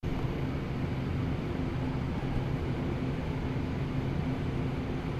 This sound is created by the servers used by journalism students.
laboratorys, UPFCS12, campus-upf